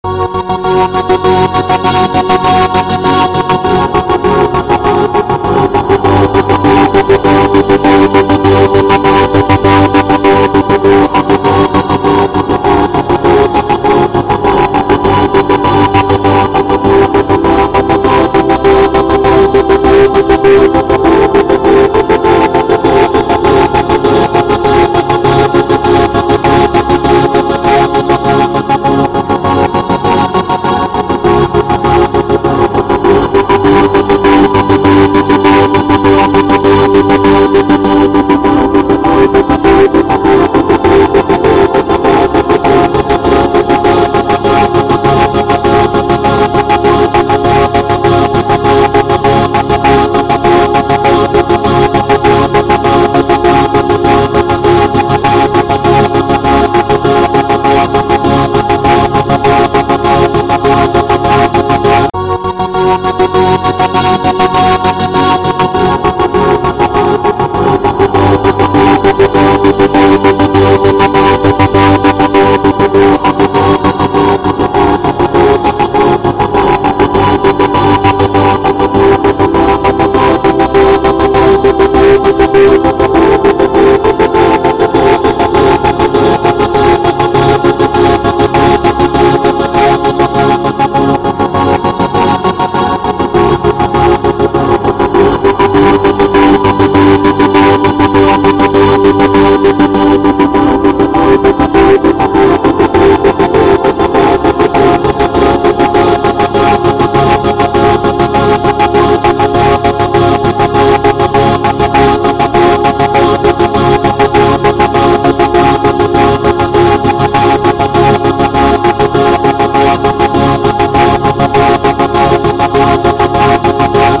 history of old times in past final done on keyboard
historical, long-time-ago, space-times, Vikings, history, middle-ages, all-the-ages, old-times, WWII